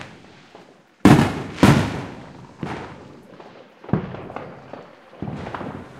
NYE Loud Booms
Series of loud aerial fireworks.
boom, rocket, explosion, new-years-eve, fireworks